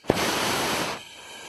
Torch - Gas flow
Gas torch gas flow.
work, torch, 2beat, tools, welding, crafts, fire, 80bpm, gas, metalwork, labor